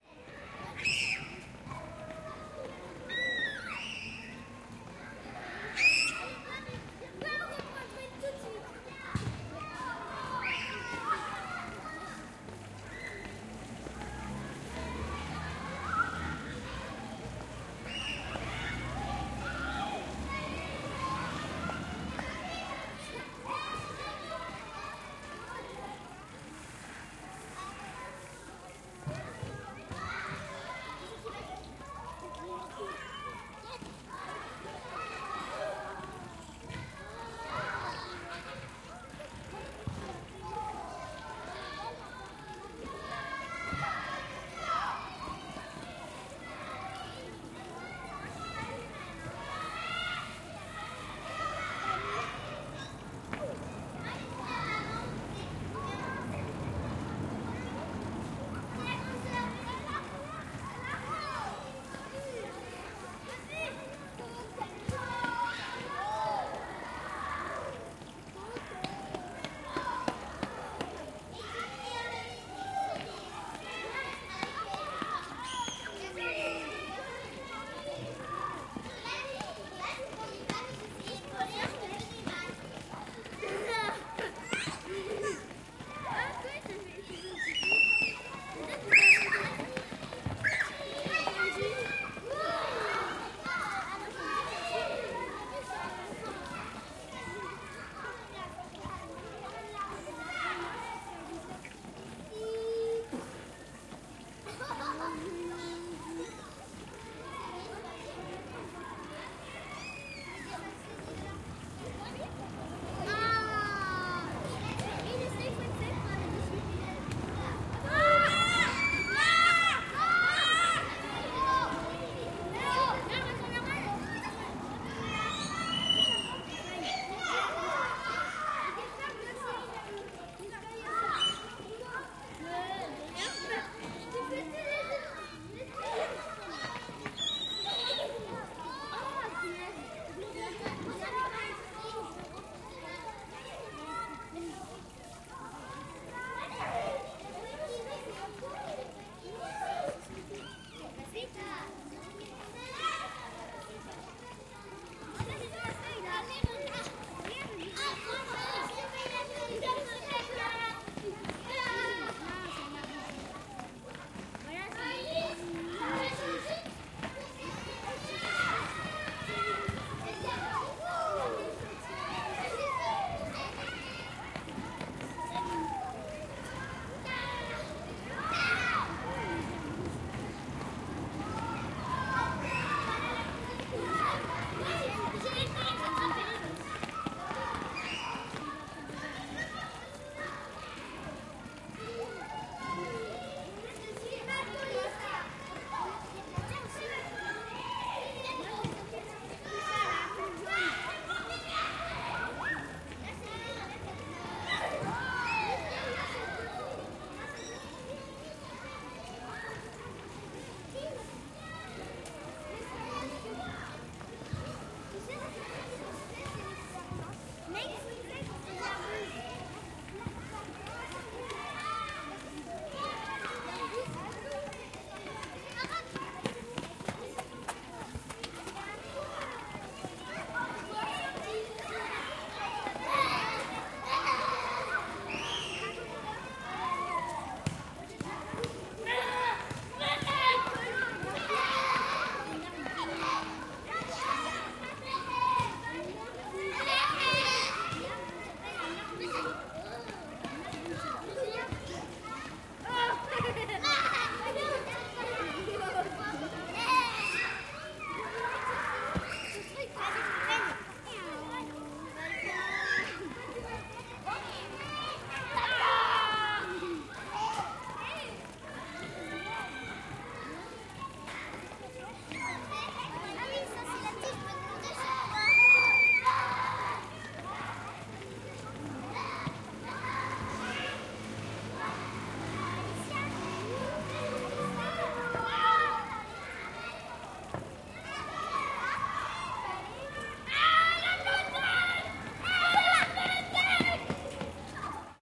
Récréation en école primaire (children playing at school)
Enfants jouant pendant la récréation, école primaire d'Andernos-les-Bains, Sud-Ouest de la France.
Children playing during the morning break, elementary school of Andernos-les-Bains, South-West of France.
children, shouting, school, playing, school-yard